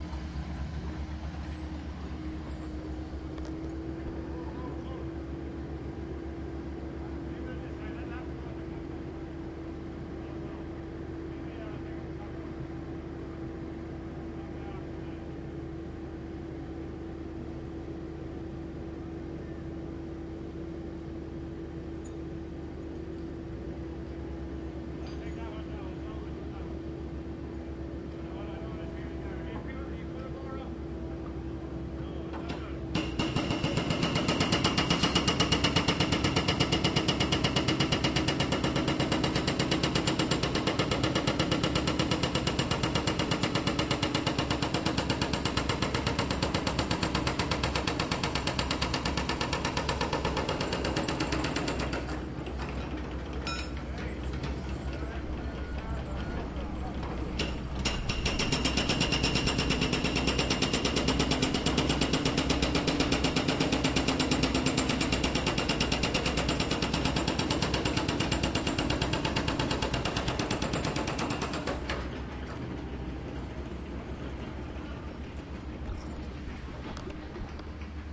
jackhammer vehicles and gennies
On the same beautiful summer day as the concrete saw, we have the not-at-all repetitive thump of a jackhammer, along with the sounds of construction vehicles moving along, chains rattling, and generator sounds.